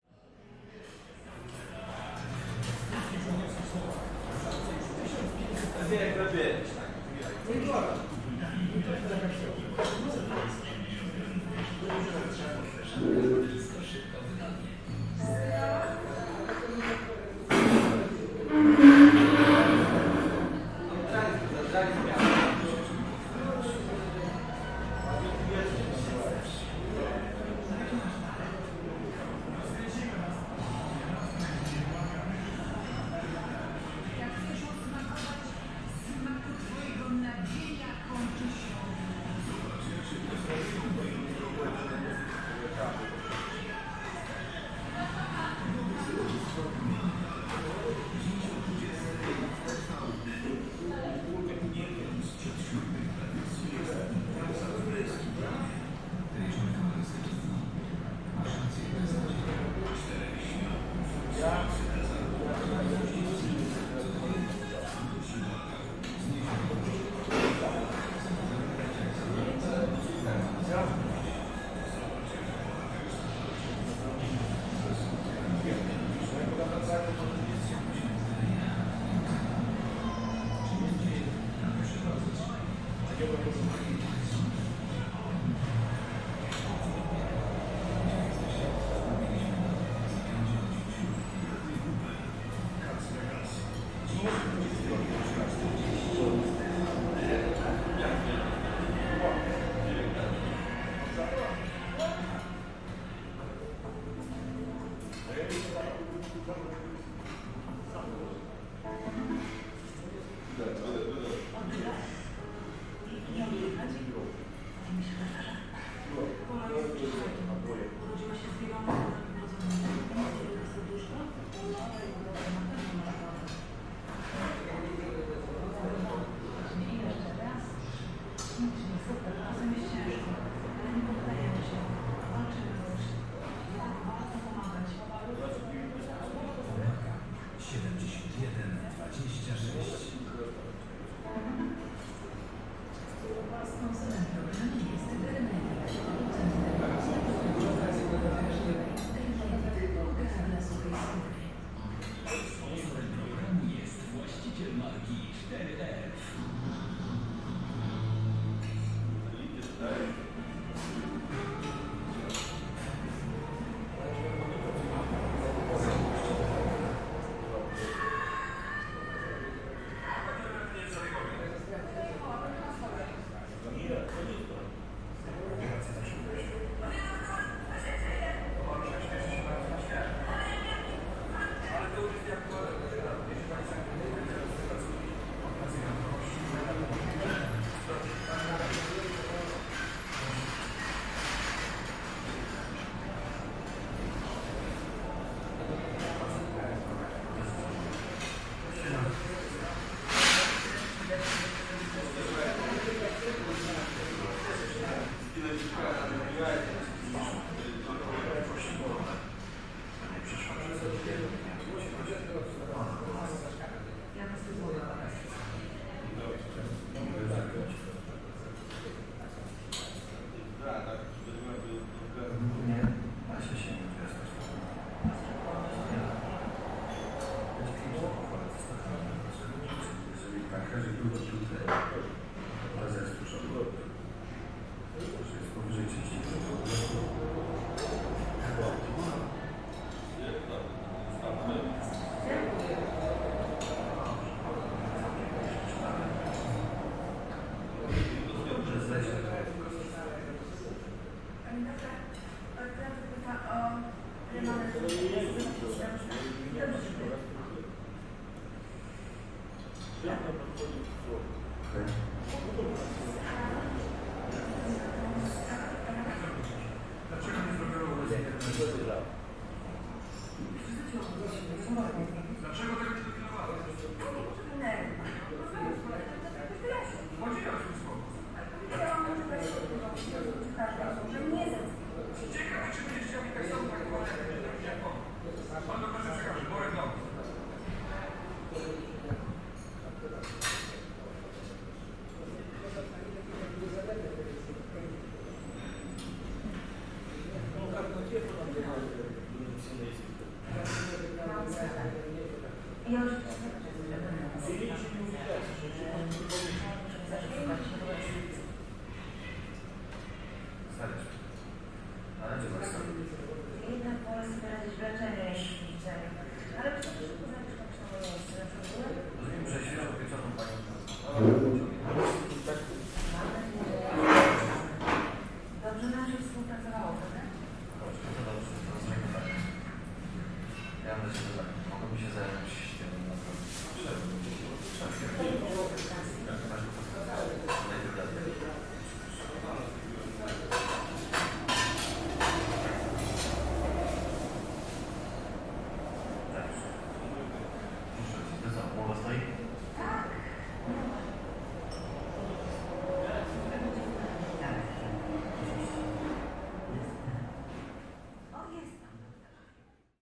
13092014 mostki las vegas restaurant 001
Fieldrecording made during field pilot reseach (Moving modernization
project conducted in the Department of Ethnology and Cultural
Anthropology at Adam Mickiewicz University in Poznan by Agata Stanisz and Waldemar Kuligowski). Soundscape of the Las Vegas restaurant in Mostki village. Recordist: Robert Rydzewski. Editor: Agata Stanisz
fieldrecording, noise, restaurant, roadside, television